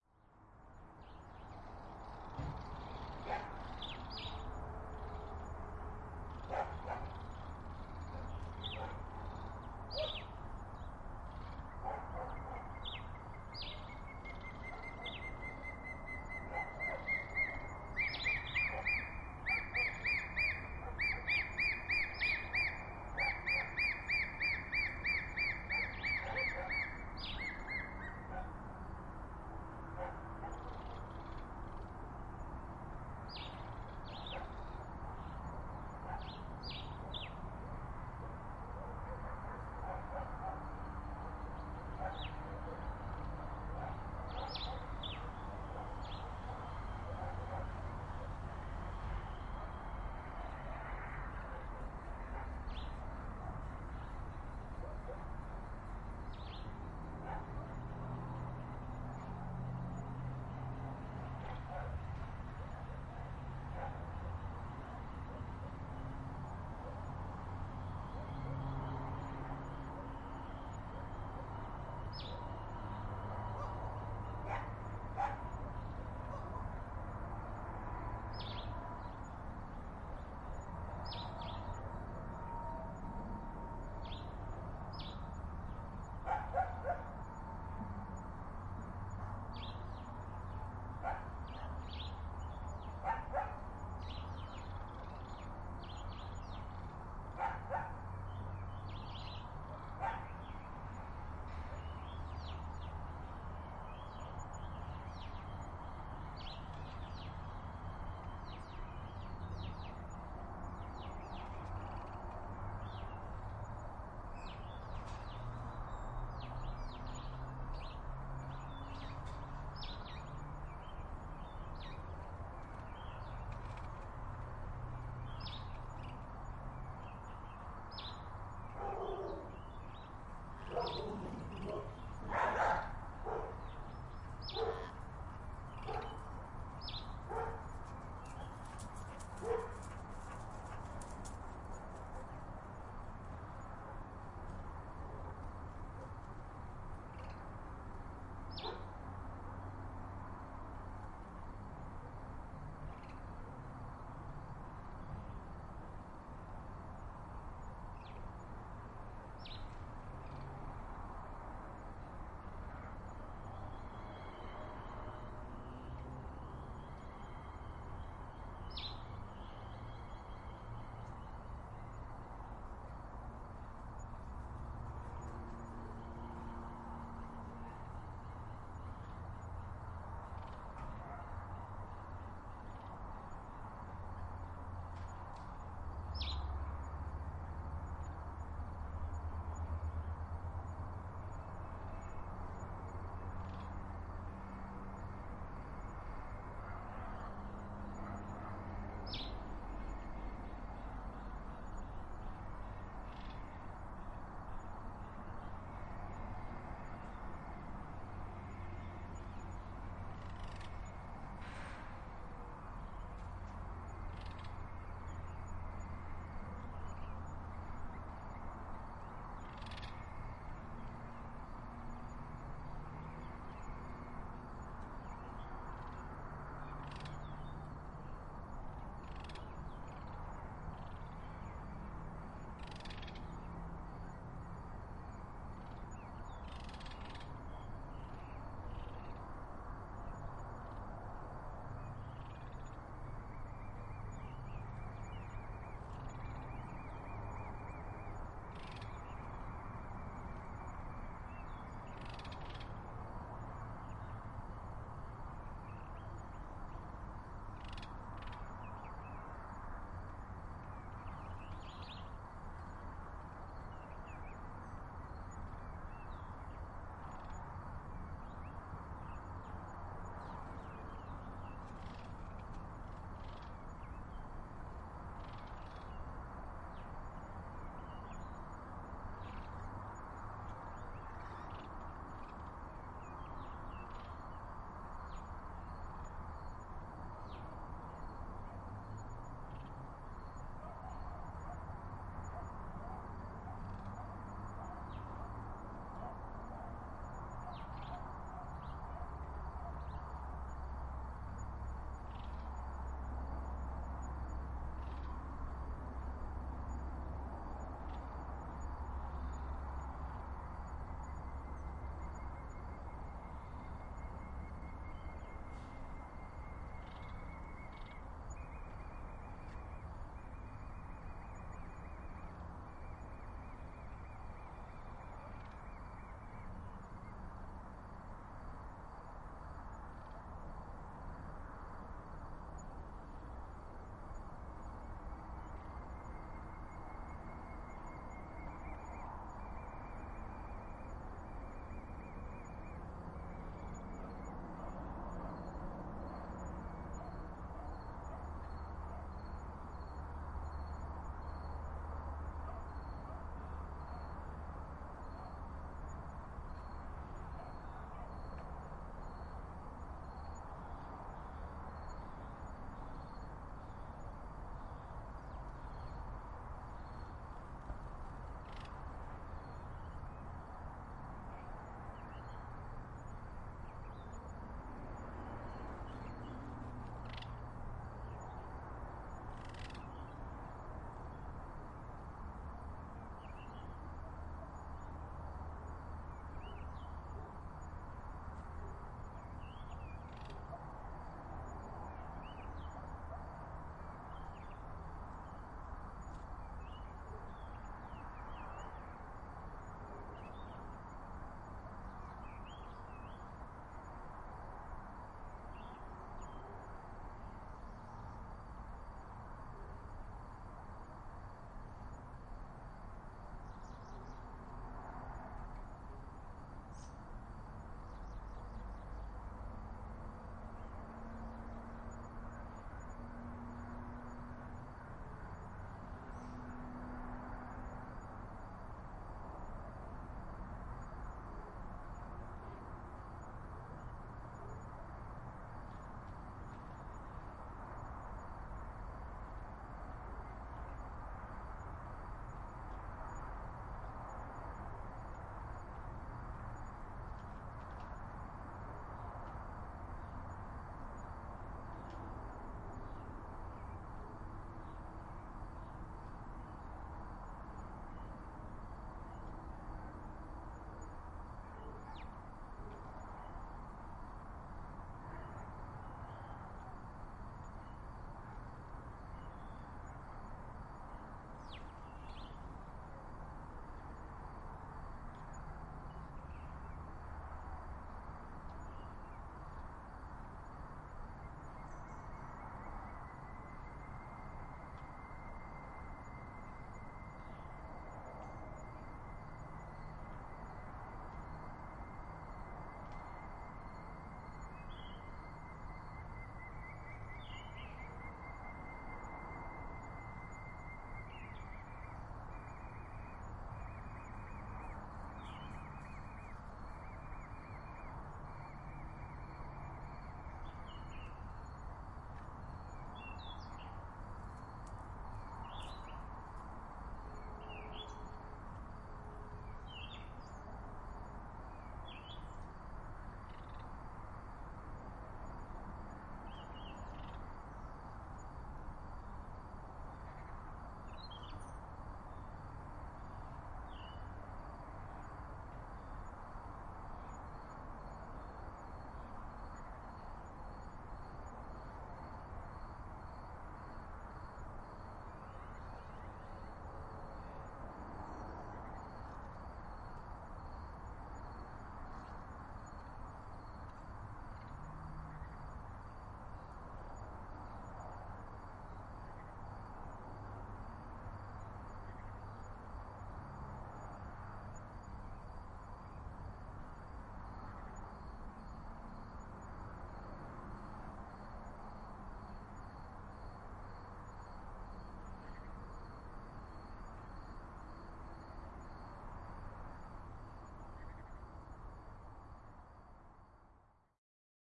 Ambience Twighlight 1
A long ambience outside in our back yard with the neighbor's dog barking, and a spotted thick-knee chirping nearby.
ambiance, ambience, ambient, back-yard, bark, birds, crickets, dog, field-recording, nature, outside, spring, stereo